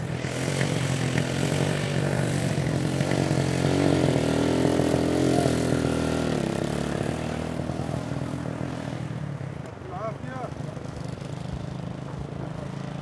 motorcycle throaty pull away medium speed smooth Gaza 2016
away, motorcycle, pull